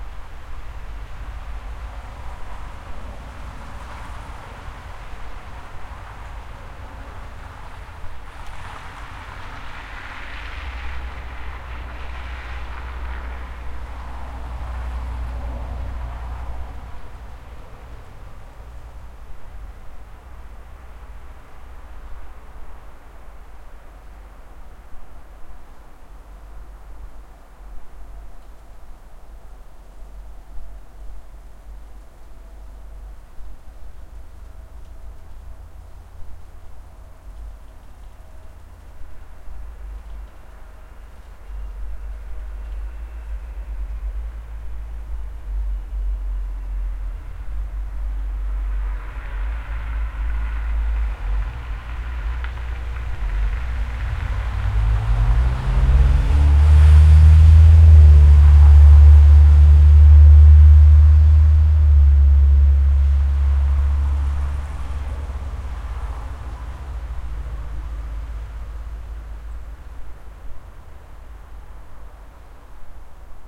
Just outside our house on the garden and a way a bit from our house.
air, atmosphere, birds, cars, outside